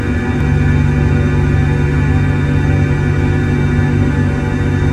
Created using spectral freezing max patch. Some may have pops and clicks or audible looping but shouldn't be hard to fix.
Atmospheric, Freeze, Sound-Effect, Everlasting, Still, Soundscape, Background, Perpetual